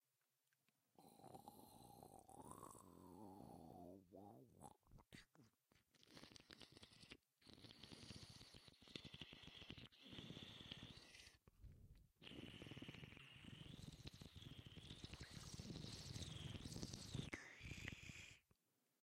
sonido de gusanos en movimiento
patagonia, gusanos, insectos, tierra